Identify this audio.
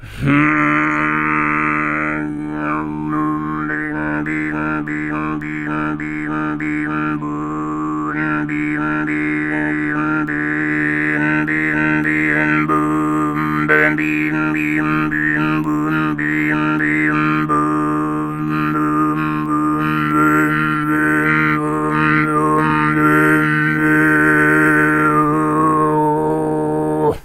alfonso low variations 08
From a recording batch done in the MTG studios: Alfonso Perez visited tuva a time ago and learnt both the low and high "tuva' style singing. Here he demonstrates the low + overtone singing referred to as kargyraa. This file has some variations in it, made with the tongue and lips.
overtones, singing, kargyraa, tuva, throat